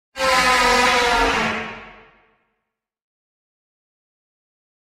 First ever jumpscare sound. Made in TwistedWave.